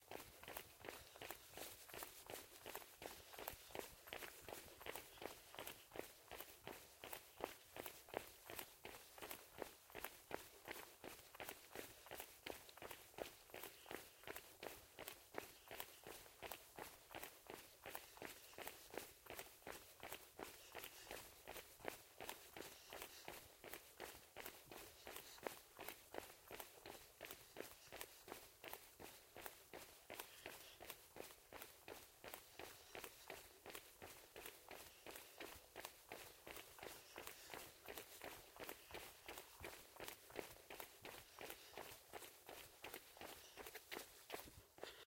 Me running on the road.
running, footsteps, run-on-road, run